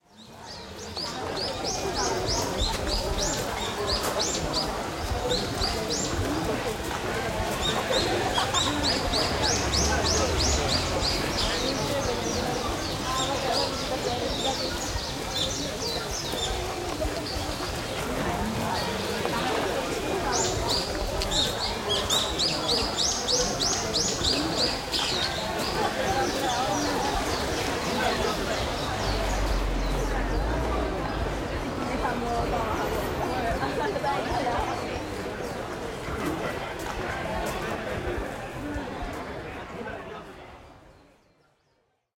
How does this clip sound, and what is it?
Spring season in Japan.
Recorded on a Zoom H5 with a Rode NTG3 Microphone.
Mixed in ProTools 12.